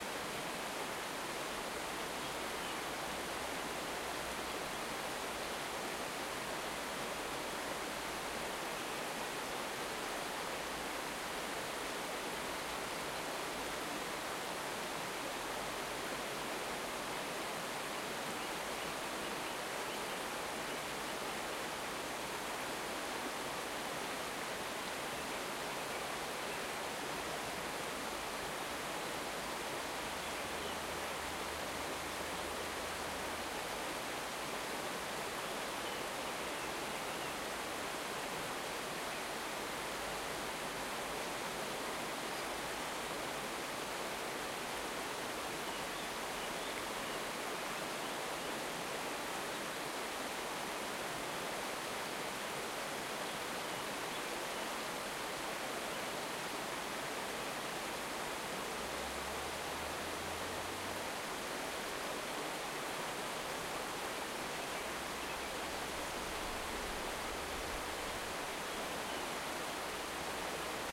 The noise of the river in forest
The sound of the river with boulders. The birds sing in the forest environment.
ambience, bird, river, nature, ambient, birds